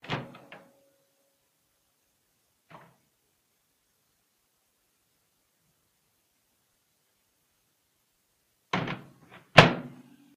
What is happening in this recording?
Microwave oveN door open closerecord20151218235055
Microwave oveN door opened and closed. Recorded with Jiayu G4 for my film school projects. Location - Russia.
microwave, opening, closing